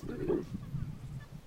Monster Snort, Breath
breath, monster-snort-breath, monster, snort, fantasy